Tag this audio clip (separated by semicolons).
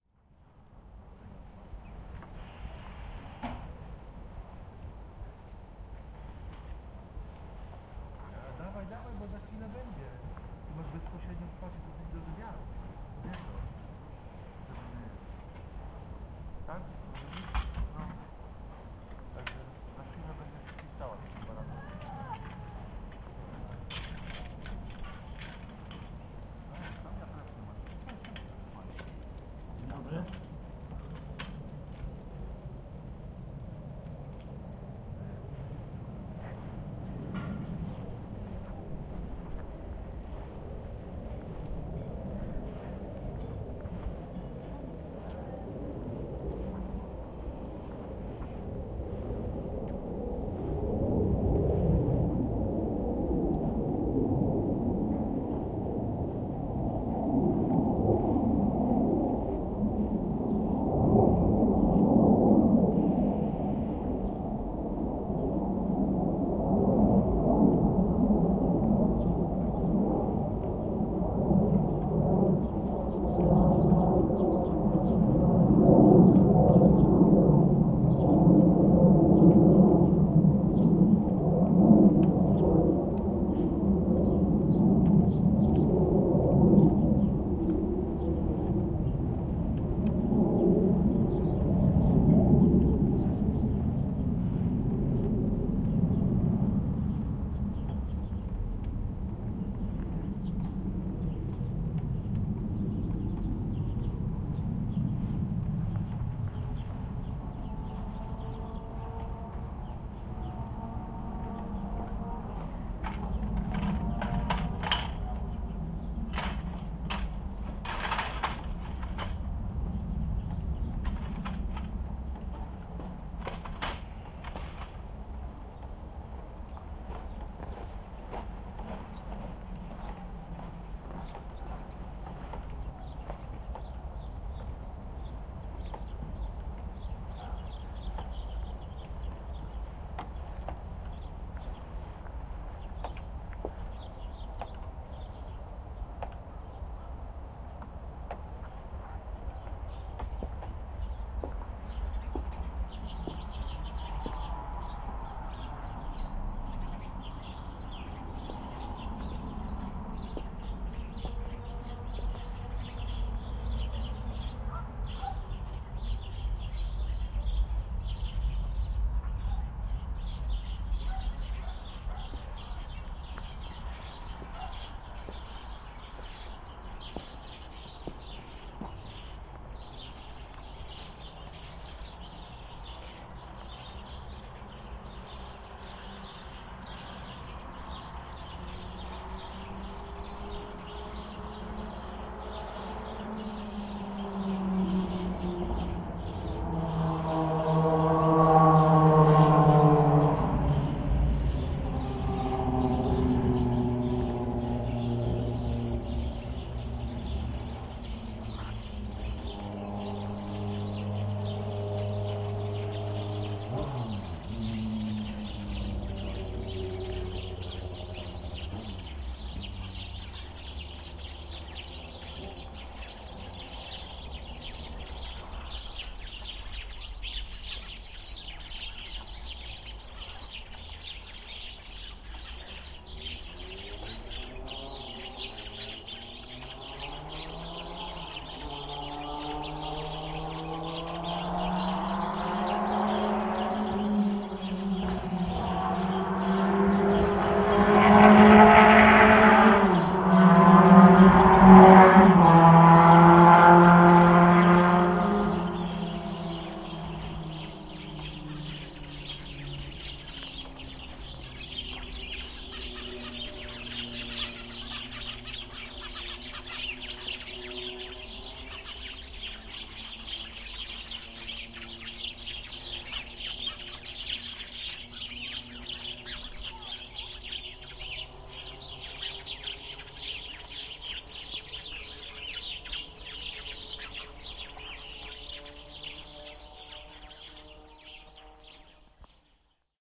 ambience; apino; car; fieldrecording; Kaszuby; noise; plane; Poland; rural; street; sunday; village